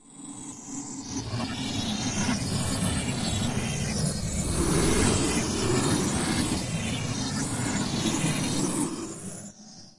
Intergalactic communication space noises made with either coagula or the other freeware image synth I have.